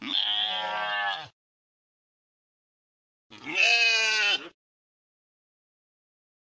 Sounds of two adult goats. Most of the first sound's background noise is removed, but I couldn't properly get the people talking in the background removed in the second sound.